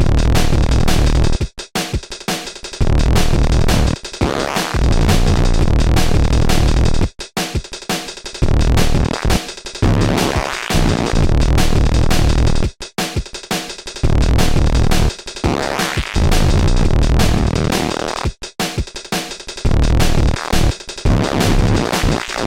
drilla Rendered
sample of bass